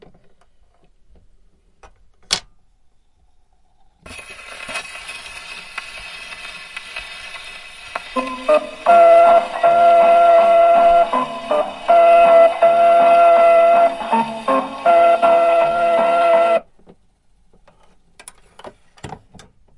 Content warning
The sound of the needle put on the record. The vinyl starts playing and stops with switching-off sound. The sound the pickup needle makes when lifted up from a rotating vinyl record.
Recorded in stereo on a Zoom H4 handheld recorder.
dusty-vinyl, gramophone, hiss, crackle, surface-noise, vinyl, phonograph, turntable, warm-vinyl, record, static